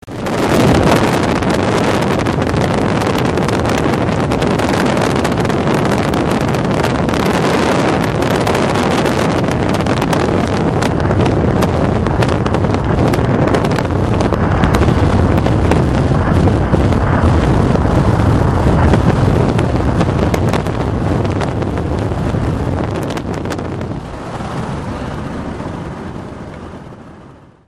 A recording of wind whipping by. (WARNING: Loud!) It was made by putting the microphone close to a car window as it was driving. It sounds rather like a strong wind storm or hurricane. :-) Taken with a black Sony IC digital voice recorder.
Edit: Apologies for the fade-out. Not only did I use this sound from my brother, but I was young and didn't know that a fade-out is just annoying and doesn't help anyone.